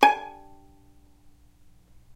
violin pizz vib G#4
violin pizzicato vibrato
pizzicato, vibrato, violin